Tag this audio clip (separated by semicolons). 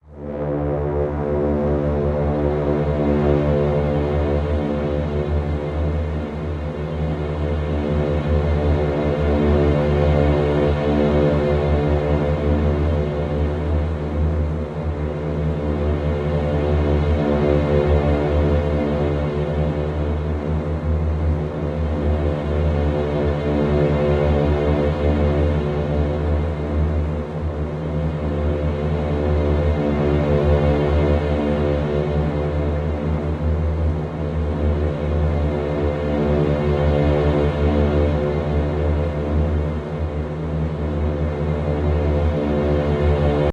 suspense; atmosphere; stab; synthesized; sinister; melodic; dark; tremolo; drone; music; background; synthesizer; haunted; synth; electronic; creepy; horror; sting; strings